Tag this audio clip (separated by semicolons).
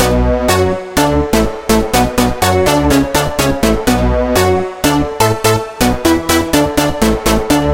2015; Alesis; ANALOG; Andromeda; DEEP; DEEPHOUSE; FREE; GROOVE; HIT; HOUSE; MELODY; SYNTH; TOP; TREND